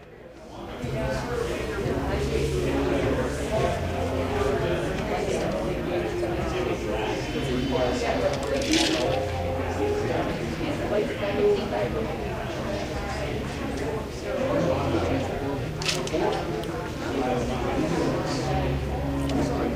Ambient, restaurant: people talking, drums and piano playing, silverware noises. Recorded with a Galaxy S4. The sound was processed to remove peaking and crashing noises.
background, field-recording, ambience, ambient